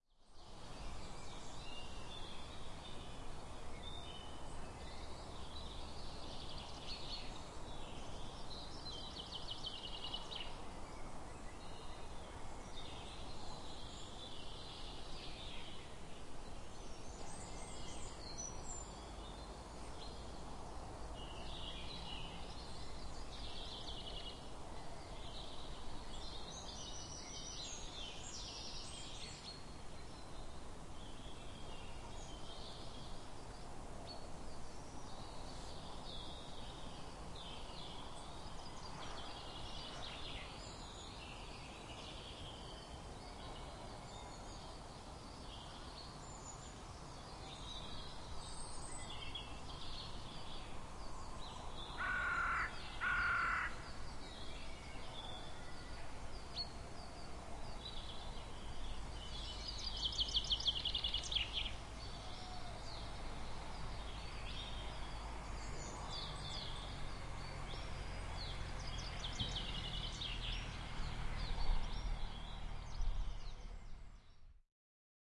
Sk310308 chaffinch crow
The sounds of a breezy spring day at Skipwith Common, Yorkshire, England.